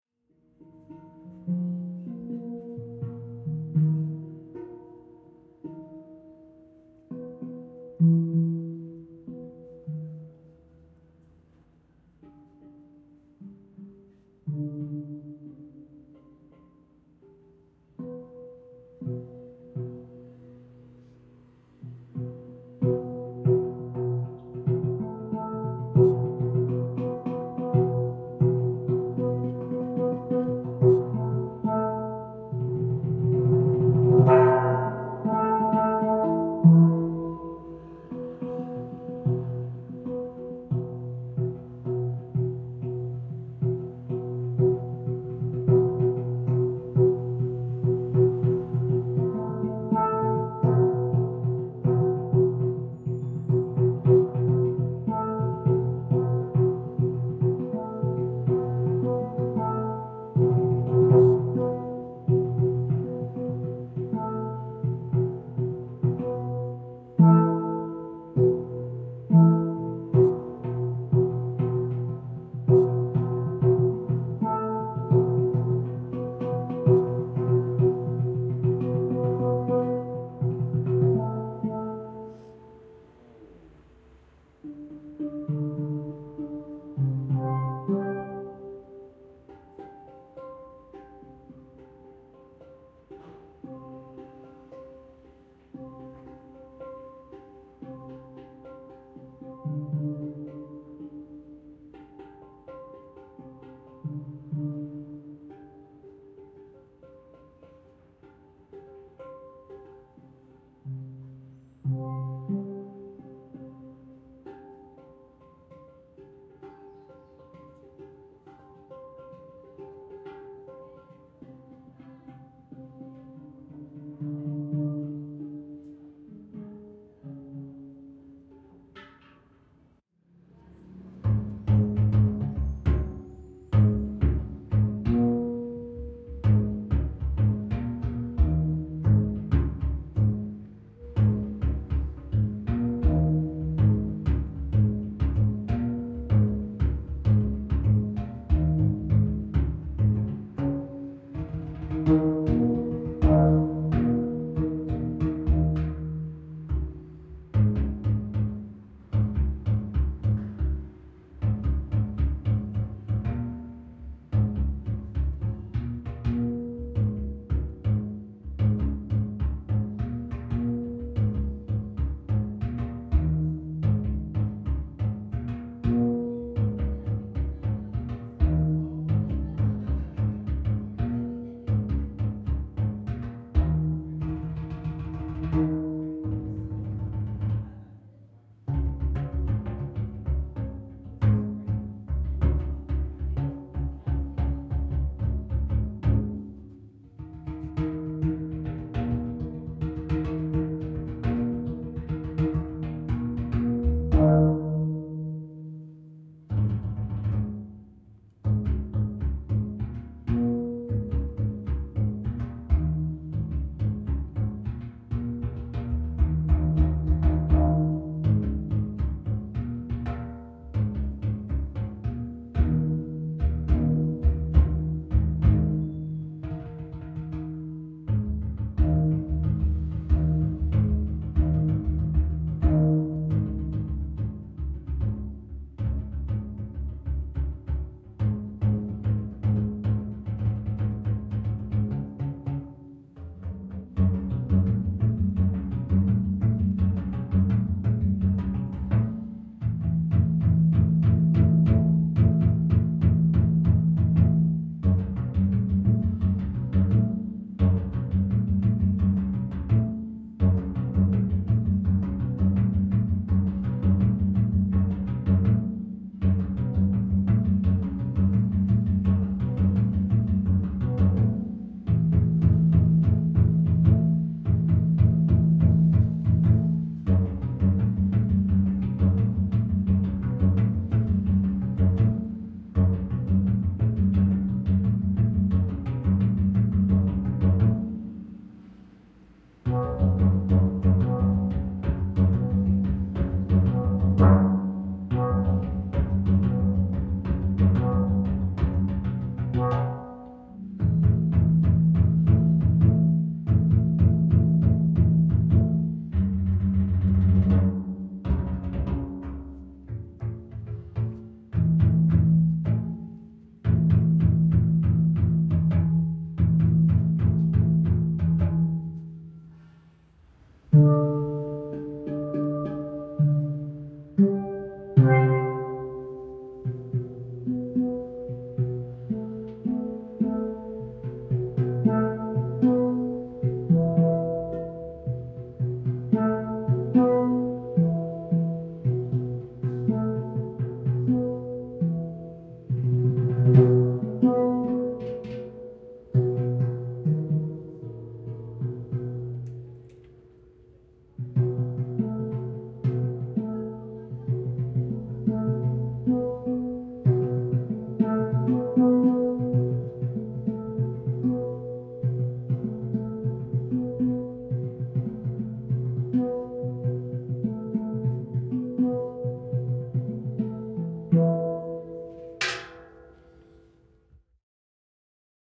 Tokyo - Steel Drums
Be lying if I said this was hi-fi. Me in a Tokyo drum museum improvising on an instrument I've never played before. Very few single hits but some loopable stuff maybe. There is some outside noise at times but nothing too bad. Been slightly eq'd and compressed in Ableton. Recorded in May 2008 on a Zoom H4.